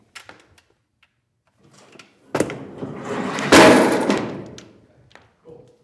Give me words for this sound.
Projector screen retracted
Retracting a pull-down projector screen
projector, pull-down, screen